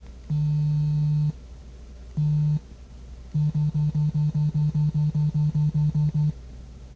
Hard FX recording of an iPhone vibrating on a blanket